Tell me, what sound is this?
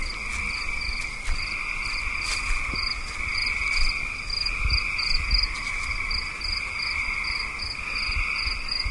Stereo recording of the evening forest sounds outside my mom's house in rural Wisconsin. Lots of crickets and frogs. And some handling noise.
crickets, found-sound, nature